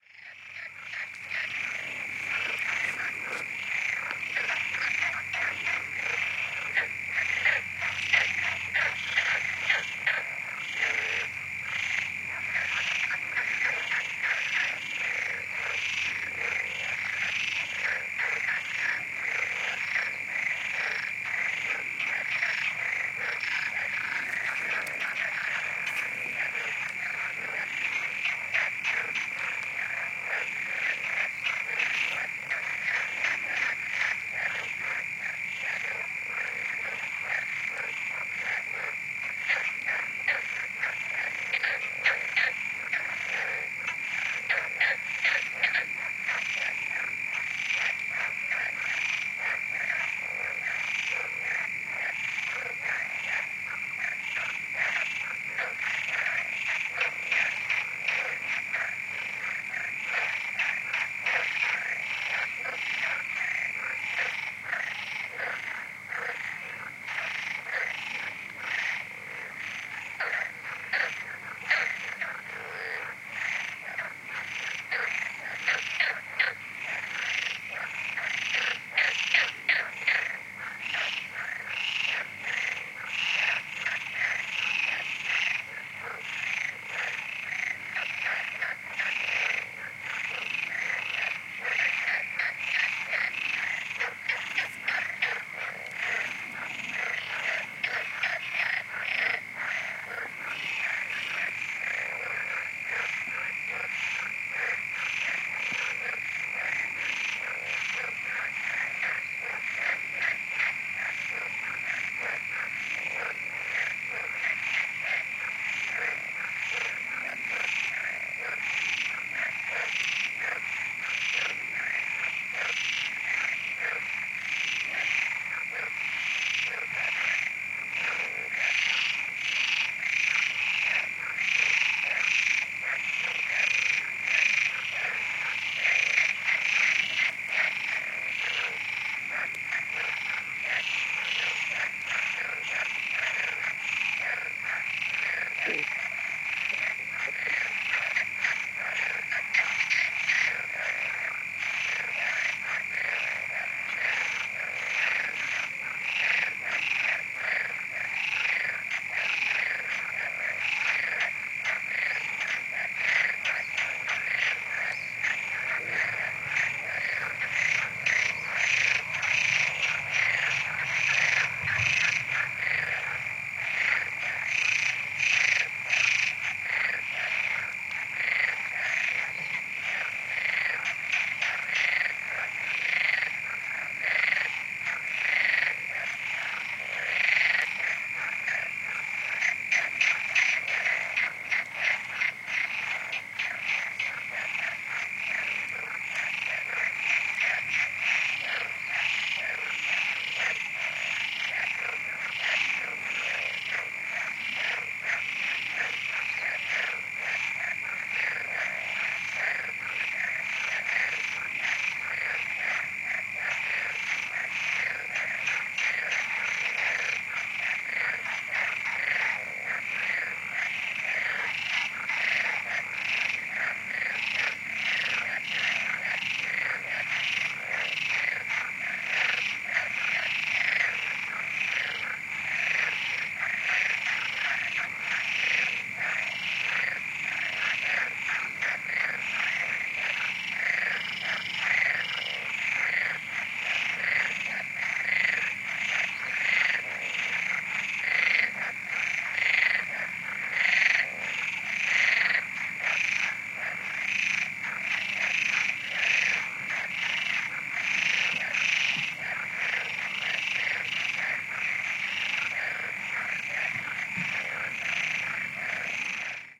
20160526 frogs.close.13
Frog and cricket chorus. Primo EM172 capsules into FEL Microphone Amplifier BMA2, PCM-M10 recorder. Recorded near Torrejon el Rubio (Caceres, Spain)
crickets, ambiance, pond, river, marsh, nature, field-recording, croaking, night, spring, frogs